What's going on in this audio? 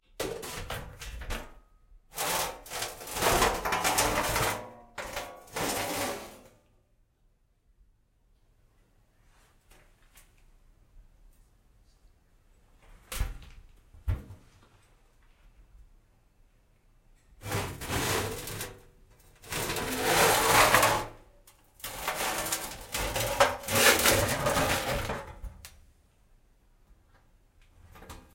sheet metal pieces drag on workshop floor various3
drag, floor, metal, pieces, sheet, various, workshop